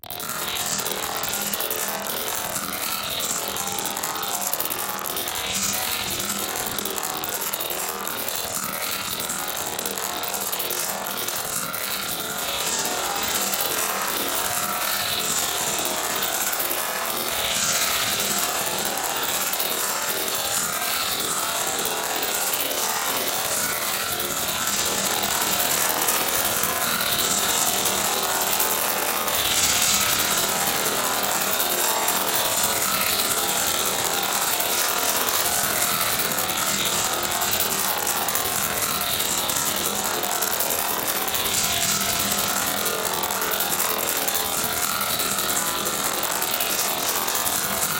PERC1rattle
Some rapid processed percussion
percussion-loop, beat, processed, percs, rhythm, fast